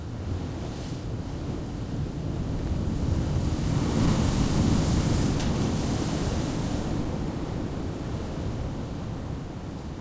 a single wave breaks on the rocks of Dyrholaei Cape cliffs , near Vik (Vík í Mýrdal; the southernmost village in Iceland). Shure WL183, FEL preamp, Edirol R09 recorder
beach, coast, field-recording, iceland, nature, ocean, splash, storm, water, wave